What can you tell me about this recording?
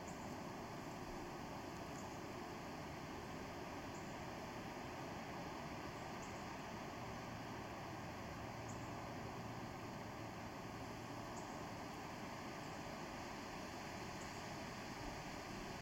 Ambience Outdoor Wind Birds
Outdoor room tone. Light wind and birds in the background.
Outdoor, Birds, Wind, Ambience